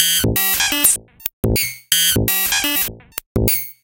ElctroClacks 125bpm04 LoopCache AbstractPercussion
Abstract Percussion Loops made from field recorded found sounds